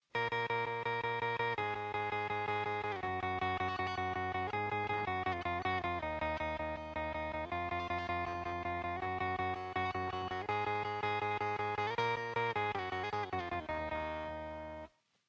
game intro 1
simple game song proper to use in menus or intros.
cartoon, retro, song, soundtrack, spaceship, video-game